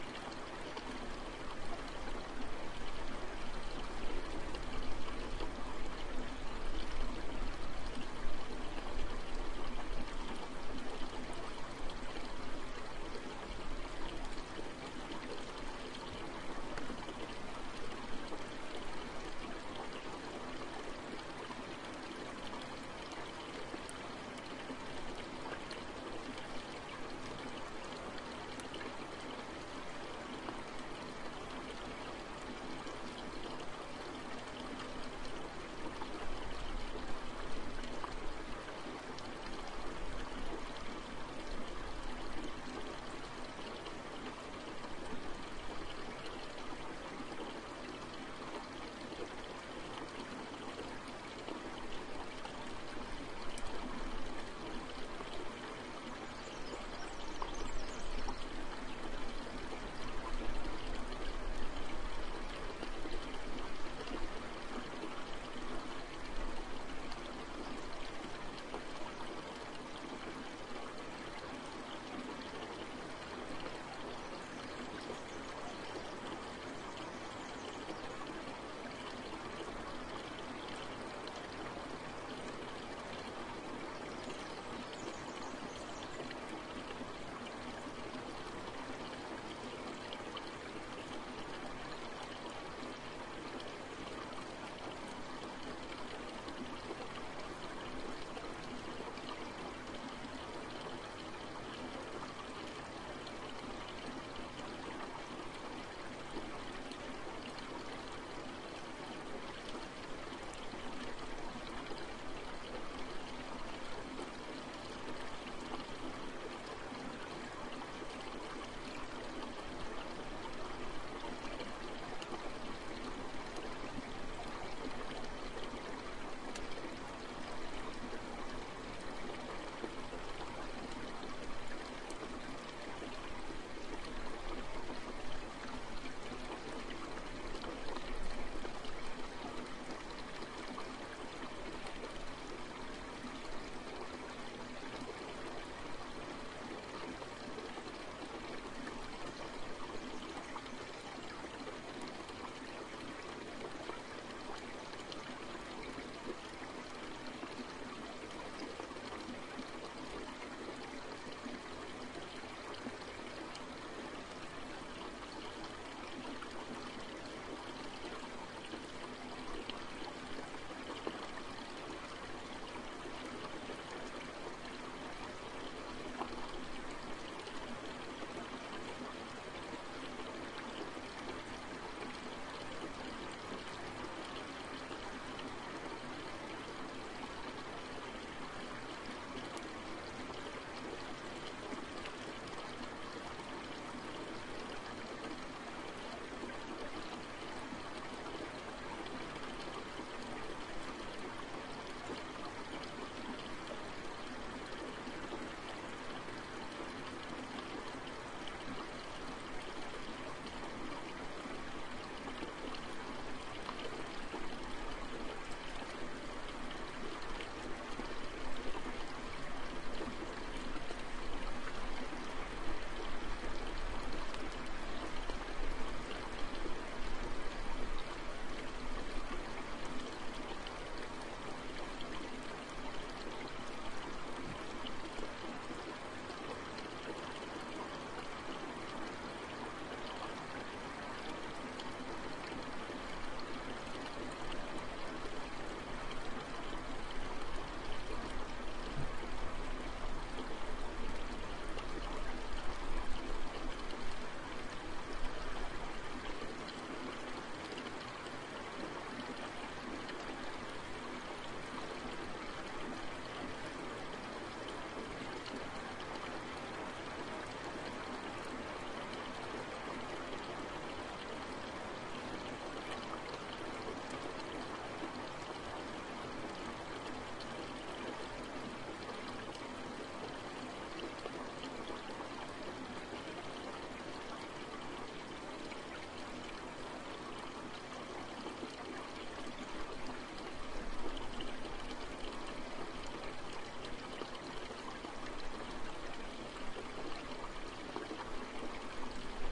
A few minutes of a nice stream flowing down a hill in the rainy Oregon forest from right to left a short distance away. There are some birds in there too and it should loop so you can listen forever. Recorded with a pair of AT4021 mics into a modified Marantz PMD661 and edited (removed some thumps when rain hit the mics) with Reason. Geotag is somewhat approximate.